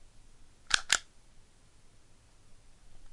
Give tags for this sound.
staple stapling

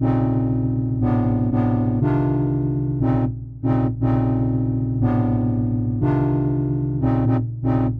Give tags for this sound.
bass tuby loop electronic horn 120bpm

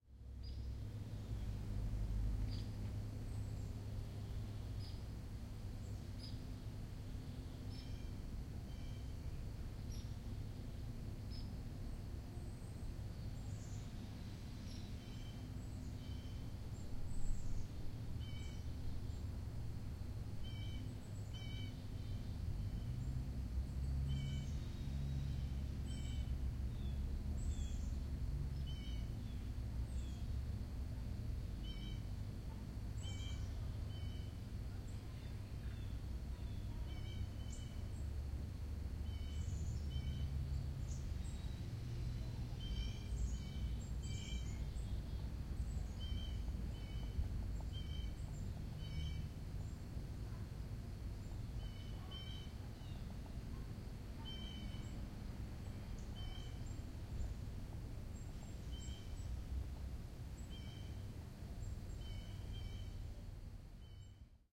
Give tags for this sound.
morning quebec birds summer canada

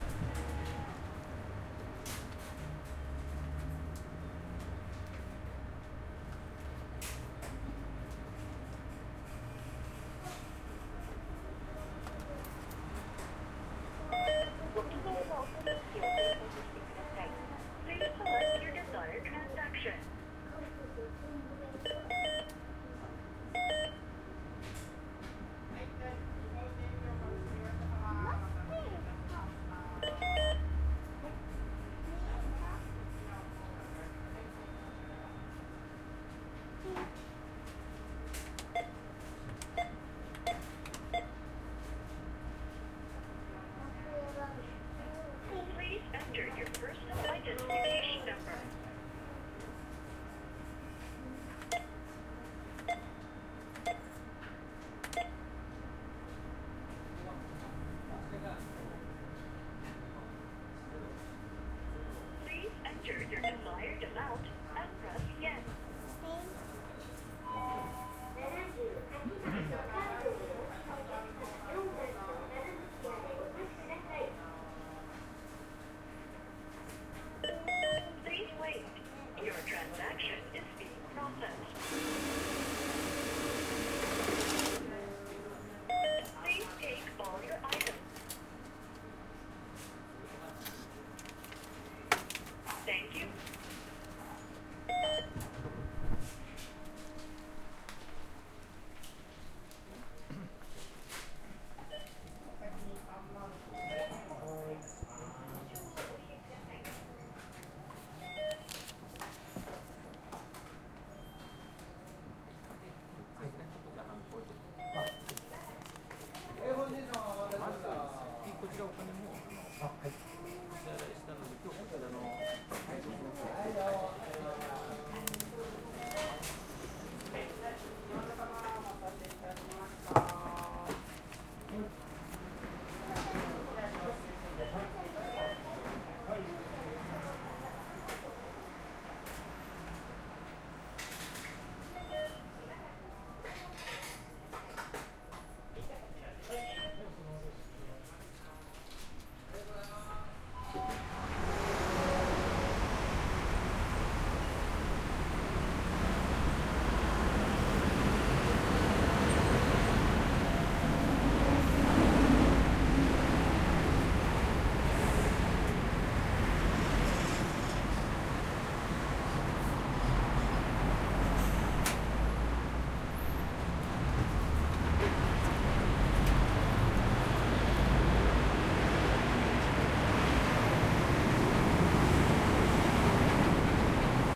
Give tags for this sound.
2014 pedaling tokyo-japan ride bicycle bike chain cycle city rider electric-bicycle crossing train mamachari

